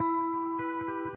electric guitar certainly not the best sample, by can save your life.
arpeggio, electric, guitar, spread